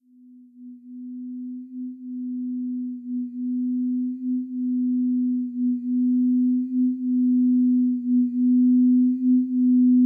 Moon-recording
I produced a song in sine curve about 250Hz with an amplitude 1,0000 ; 10 sec. I used the Audacity's effects. The first one was "normalize" ; the second one "phaser" with 4 phases 0,4 Hz ; 0 (deg.) ; 100 profundity ; 0 comeback (%). To finish i used the effect " melt into openning".
cours, Moon, recording